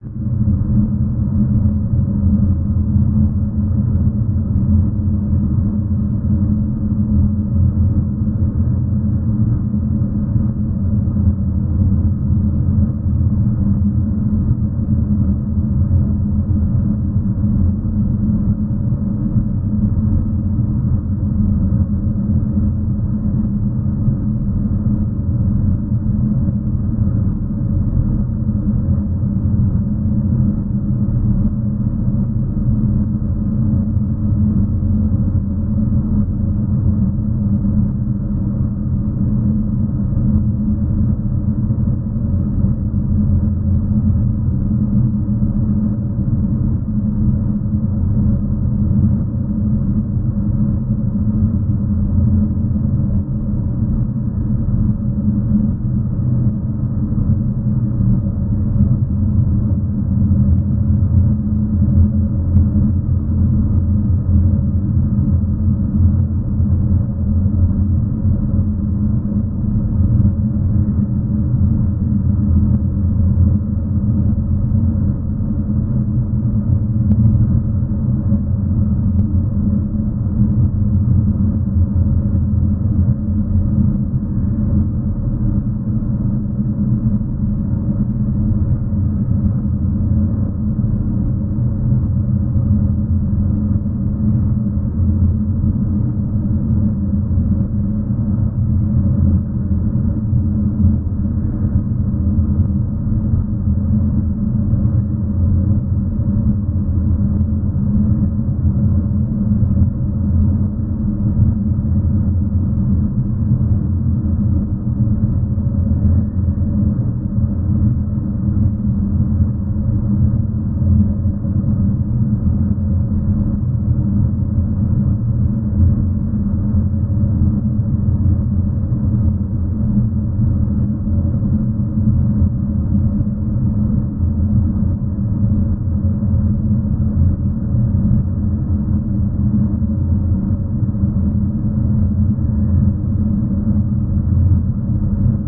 Swelled Ambience
Swelling ambient sound
Could be used for ambient sounds in an industrial setting.
Source Sounds:
Washing machine pitch shifted by 6 semi tones then slightly stretched
EQ applied accenting low end
Reverb
Compression with a ghost snare side chained to it to create the swell
enjoy
ambience, industrial, swell